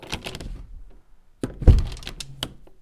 Open and close a door